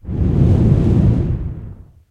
38 VIENTO SOPLANDO FUERTEMENTE
se sopla viento muy fuerte
fuertemente, soplabndo, viento